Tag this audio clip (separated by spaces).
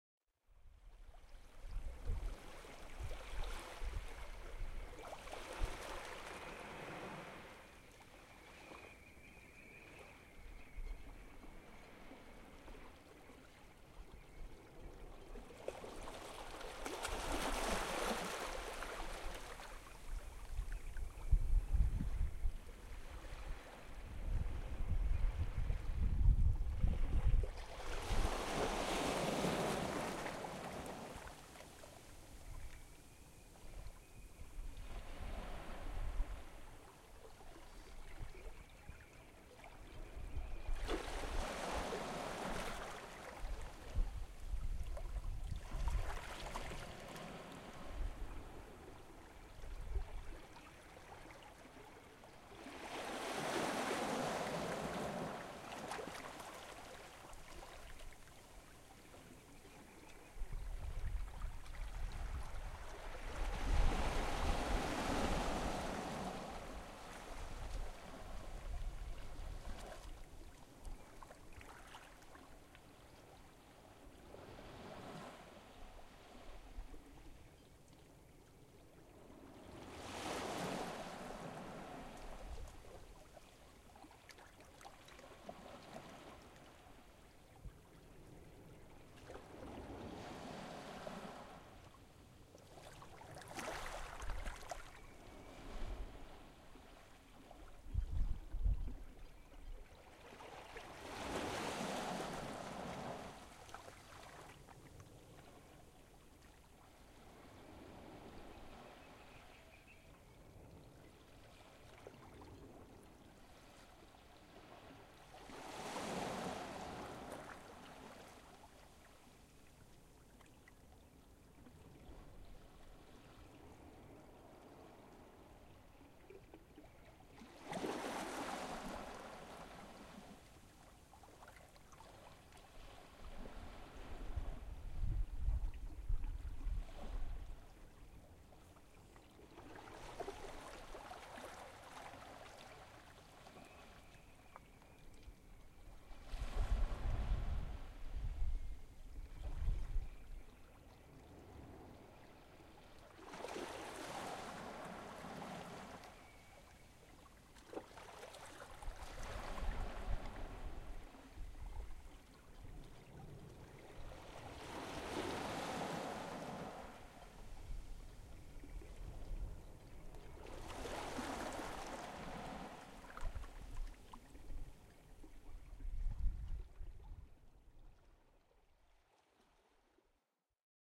beach sea shore tides water waves